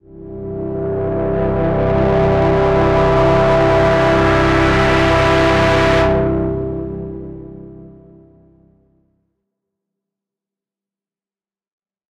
Bright riser
short riser made with vst & plugin, check the pack for other mood
fx, bright, texture, riser, soundeffect, rise, synth